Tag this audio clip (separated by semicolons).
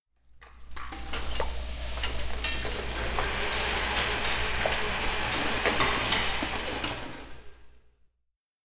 ambience; chef; cooking; kitchen